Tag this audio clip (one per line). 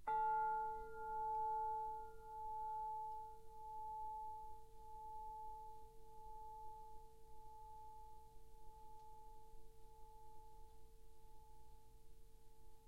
sample
bells
orchestra
chimes
music
decca-tree